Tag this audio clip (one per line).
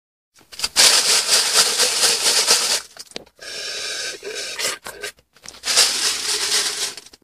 eat
shake